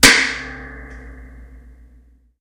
Impulse responses made with a cheap spring powered reverb microphone and a cap gun, hand claps, balloon pops, underwater recordings, soda cans, and various other sources.
convolution, impulse, response, reverb, spring